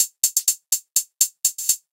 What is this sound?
hi hat loop
hat, hi, loop